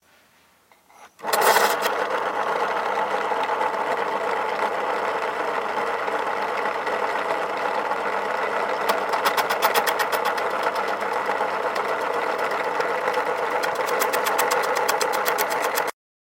MySounds GWAEtoy Pencil sharpener Kristin 2
field, recording, TCR